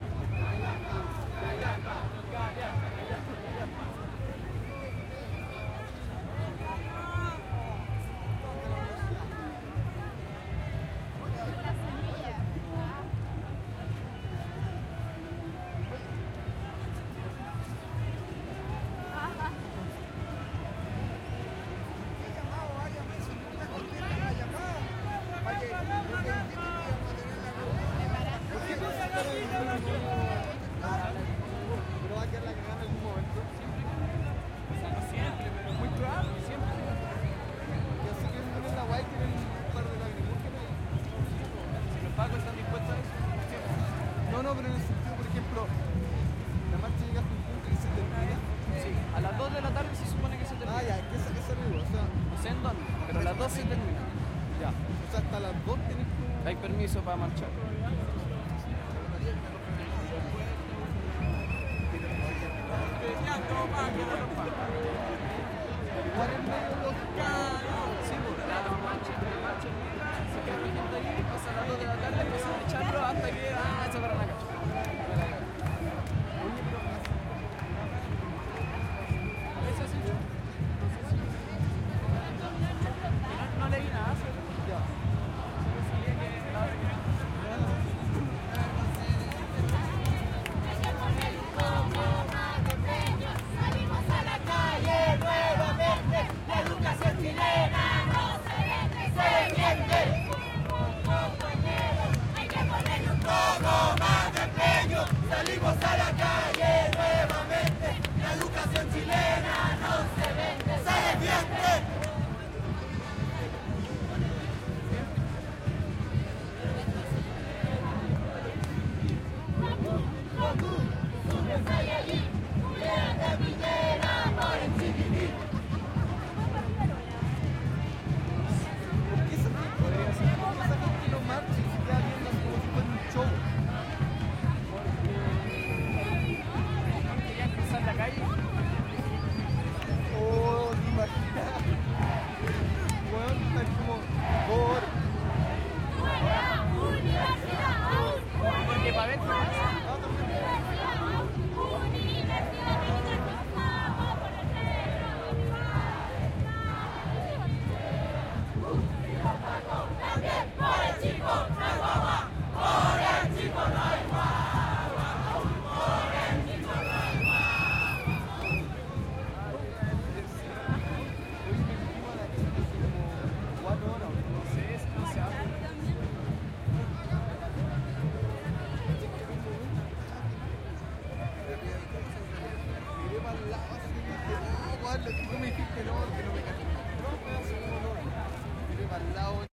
marcha estudiantes 30 junio 01 - comienza la marcha
Desde baquedano hasta la moneda, marcha todo tipo de gente entre batucadas, conversaciones, gritos y cantos, en contra del gobierno y a favor de hermandades varias.
Diversos grupos presentan algún tipo de expresión en la calle, como bailes y coreografías musicales en las que se intercruzan muchos participantes.
Callampa, callampa, explican cuándo termina la marcha. Salimos a la calle nuevamente, supersayayin uni-una, por el chico.
batucadas, carabineros, chile, conversaciones, cops, crowd, de, drums, estudiantes, march, marcha, murmullo, protest, protesta, santiago, sniff, tambores